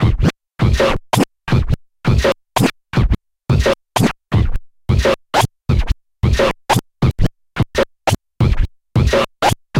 Scratching Kick n Snare @ 98BPM